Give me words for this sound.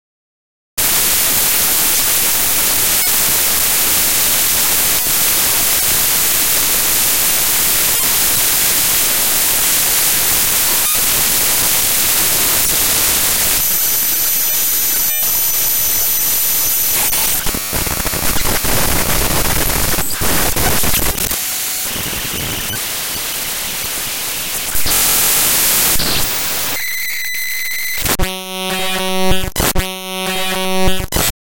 Glitch Noise 8
These are glitch sounds I made through a technique called "databending." Basically I opened several pictures in Audacity, and forced it to play them as sound files.